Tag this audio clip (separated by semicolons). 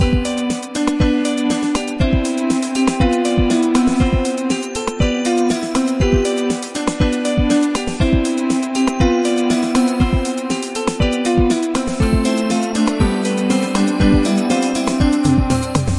game; gameloop; games; house; loop; melody; music; sound; techno; tune